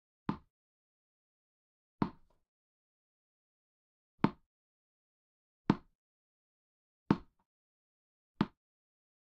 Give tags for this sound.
CZ Czech Pansk Sport Tennis Wall

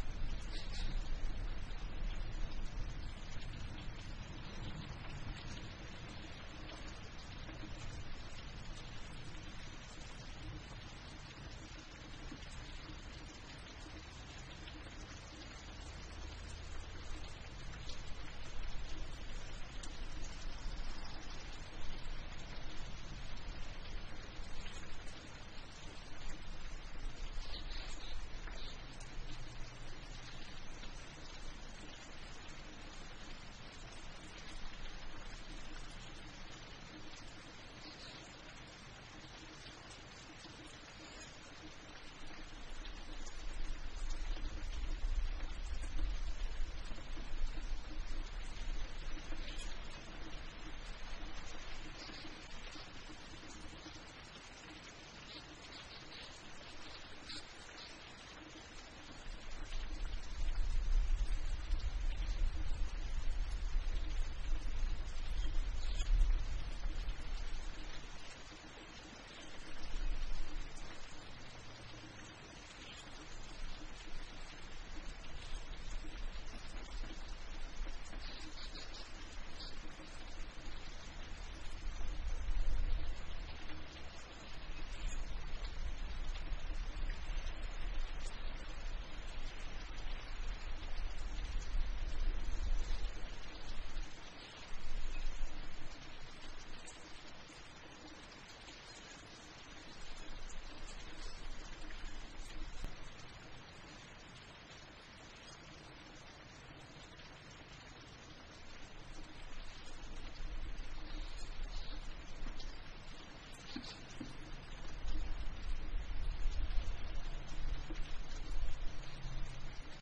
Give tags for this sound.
rain churping dripping gutter

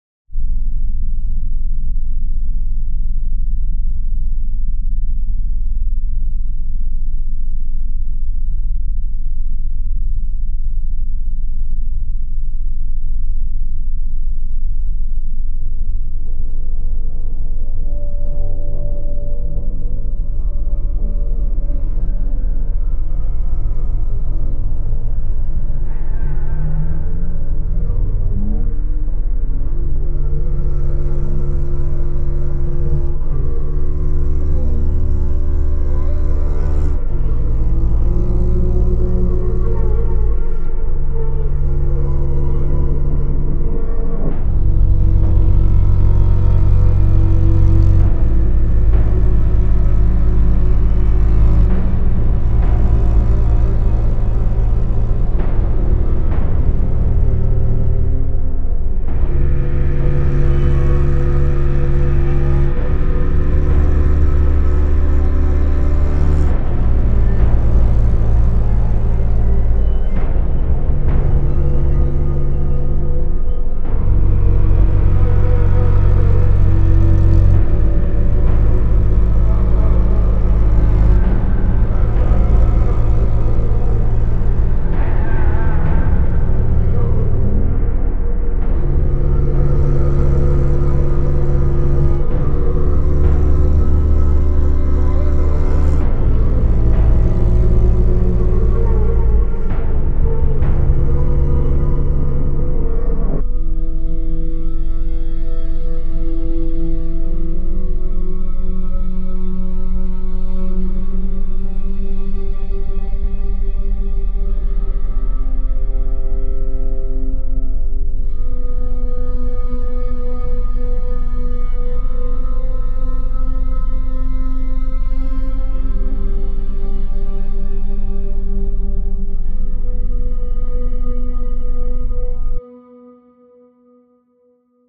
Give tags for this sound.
Ambient suspenseful Film cello Movie ambiance soundtrack Horror spooky strings Cinematic Dark intense Drone creepy sad violin Atmosphere

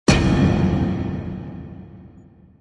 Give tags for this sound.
Hit Impact Metal

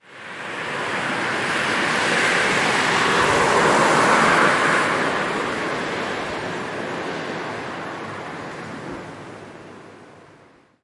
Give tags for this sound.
By Car Cars Drive evening night Pedestrian Road Slow Transport Wet

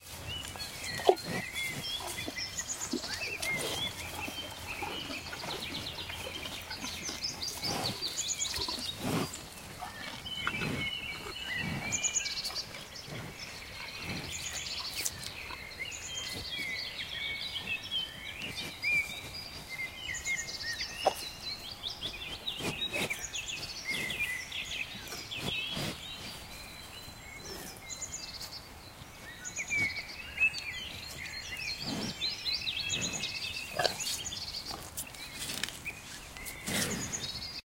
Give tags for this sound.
animal bull cattle chewing cow cows eating farm feed feeding munch munching

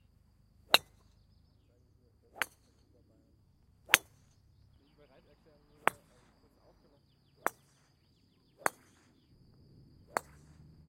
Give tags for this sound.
golf swing

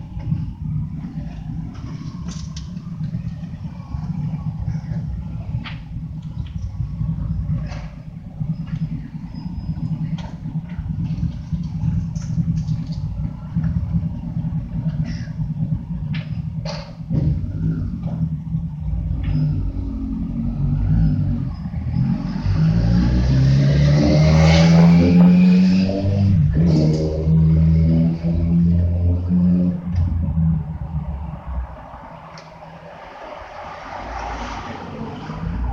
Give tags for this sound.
engine motor motorbike rev roar

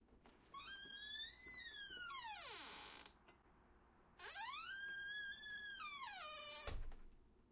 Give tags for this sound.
squeaky creak open opening closing doors wood door close creaking wooden squeak outdoor

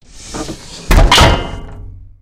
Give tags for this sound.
compactor computer game machine mechanical metal

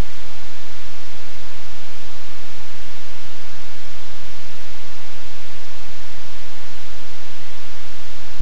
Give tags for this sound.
smooth whitenoise meditative relaxing

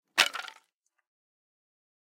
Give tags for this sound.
falling SFX

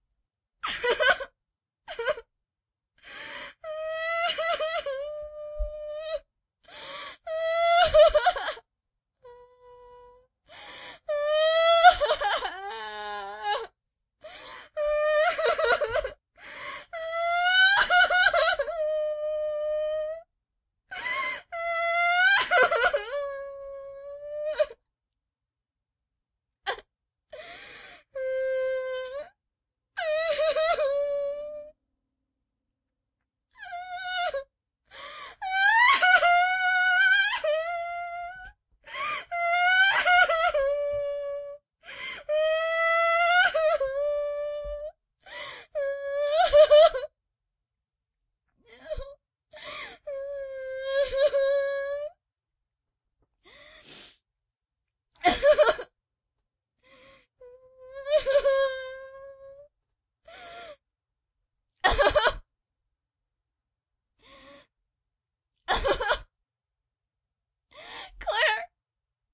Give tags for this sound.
death girl hurt painful tears

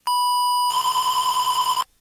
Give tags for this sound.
boy game layer

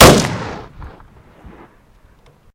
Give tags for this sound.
gun explosion shot loud

